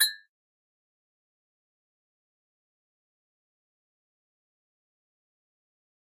Cartoon Blink
The sound a cartoon character makes when he looks to the camera and blinks.
cartoon, eyes, close, blink, realization